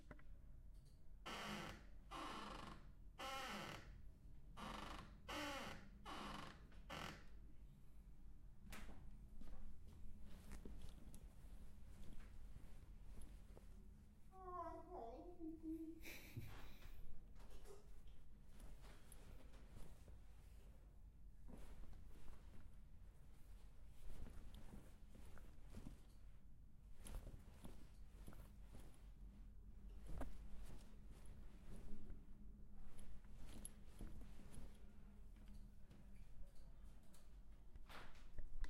creeking door clothes flapping
Open and close a door very slowly
clothes, flapping, door, creeking